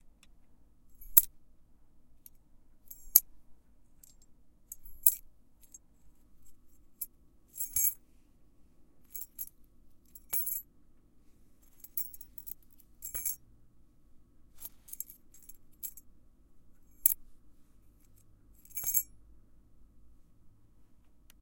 key shaking, jingle
playing with keys, shaking, jingle.
jingle, metal, tinkle, keys